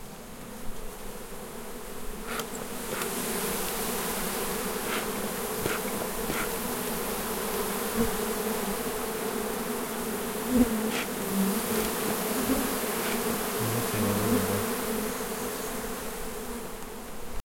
Stereo Bee hive very close.
Bees flying by beekeepers
Brushing sounds by